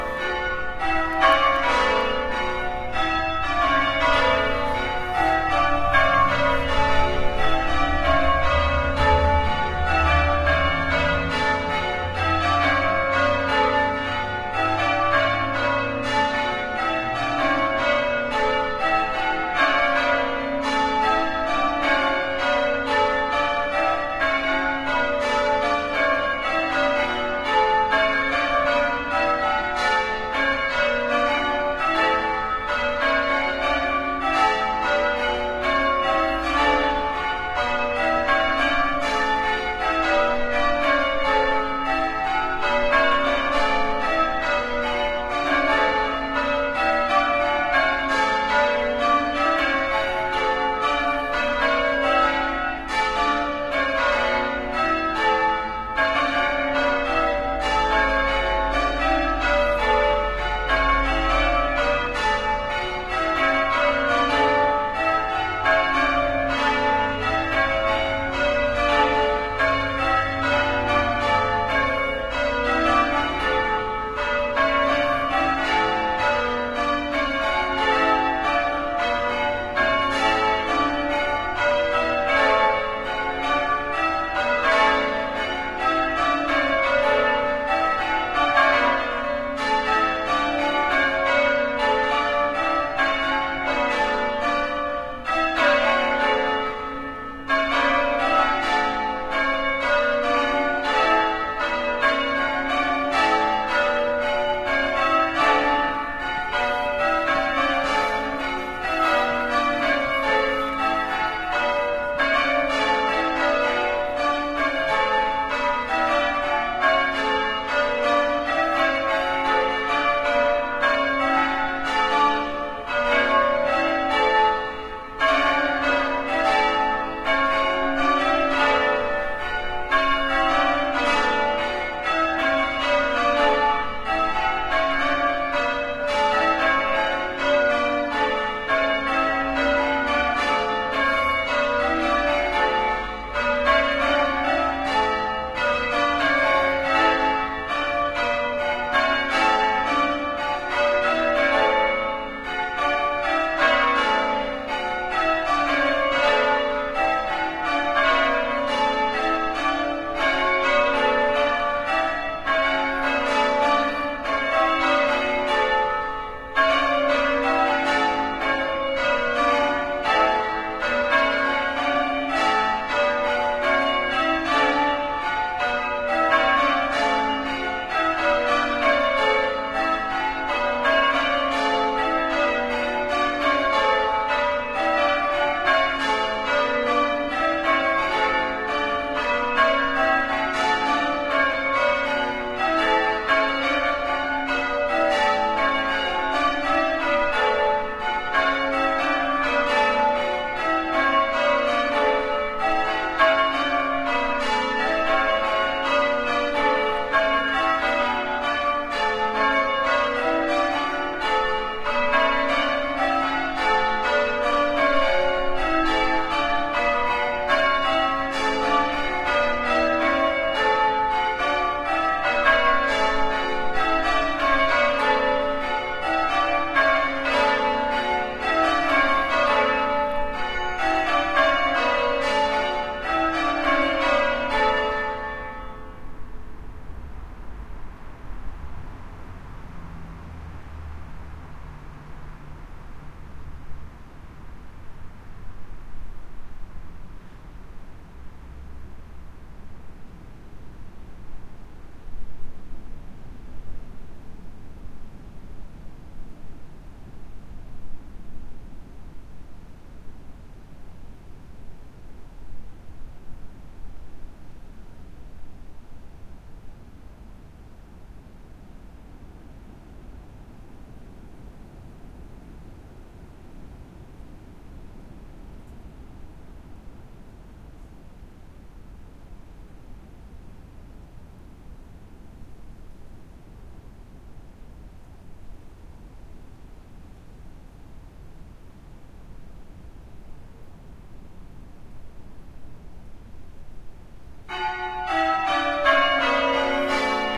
Church Bells
Bell ringers practising in Marsden, West Yorkshire. Untreated audio. A long silence has been recorded after the bells stop to enable noise removal. There is a some barely audible, infrequent, traffic noise and there are a few barely audible footsteps.
Recorded on a Roland R05 with Wolf Windshield.
Bell-ringing, Church-Bells, Church, Marsden, Bells, West-Yorkshire